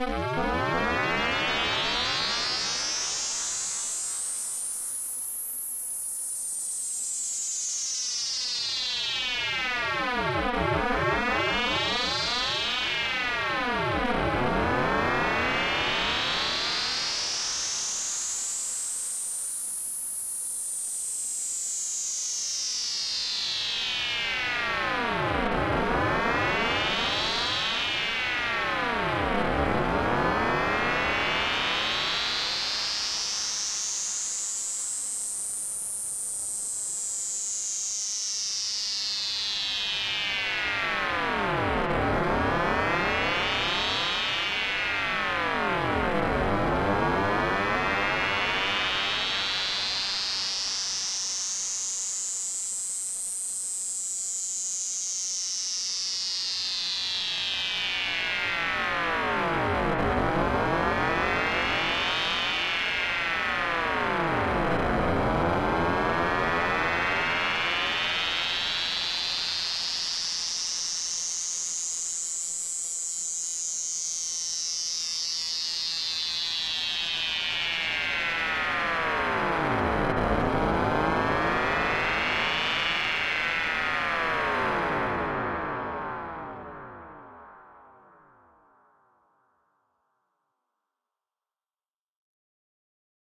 Electronic pulses phase in and out, re-verb and increase in pitch and volume. Sounds like a UFO or space effect. Generated with Sound Forge 7 FM Synth